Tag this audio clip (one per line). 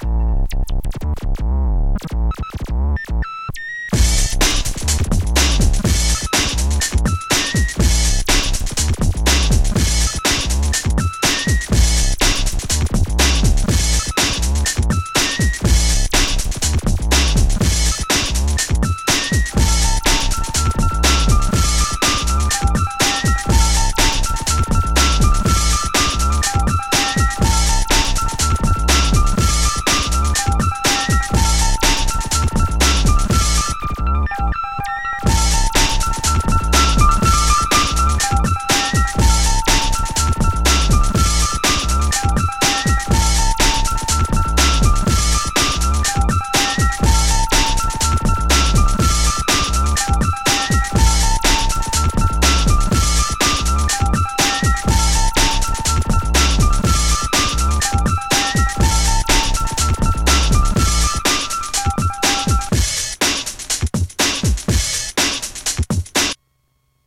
atmosphere
beat
beats
breakbeats
chill
distorsion
dj
downbeat
electro
elektro
fun
glitch
glitchy
gritty
hard
hiphop
jam
loop
minilogue
monotribe
ms20
oizo
phat
producer
programmed
rhytyhm
synth
techno